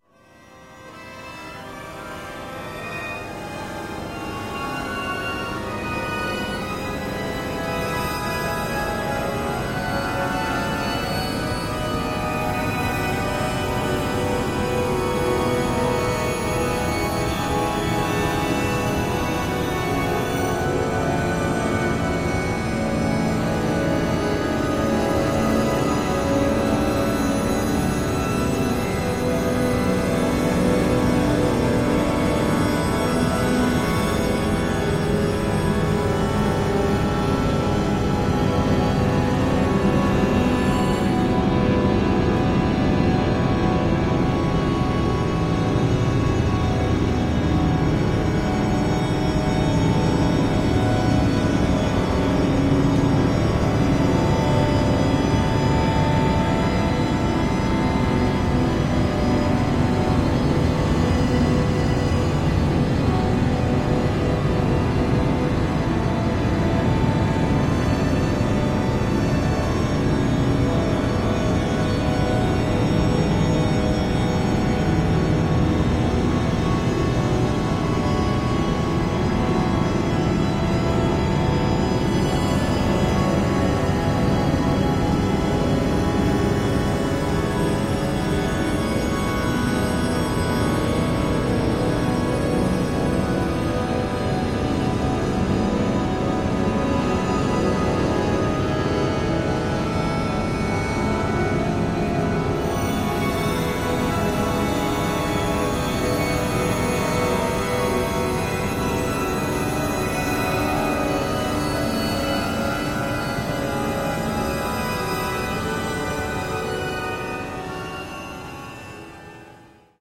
string quartet stack2
After about a minute the play-direction of all the files changes, so it returns to the beginning.
atmosphere, cluster, dark, drone, fx, horror, meditation, processed, quartet, slow, string, suspense